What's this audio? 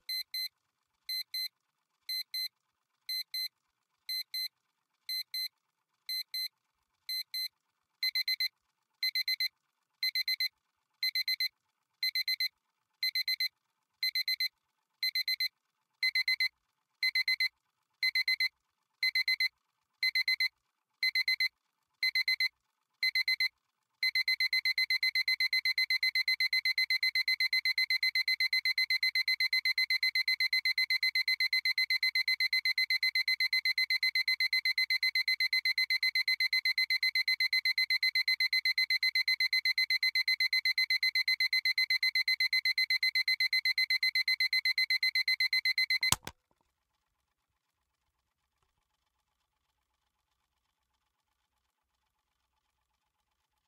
Analog alarm clock/Despertador
alarm, analog, analogico, beep, bip, clock, despertador, parar, pitido, stop